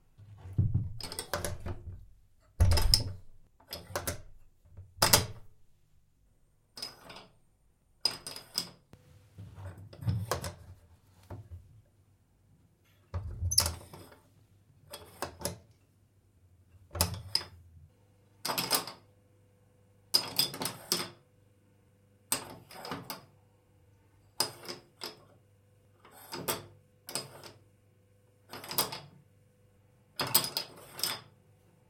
Door latch sound.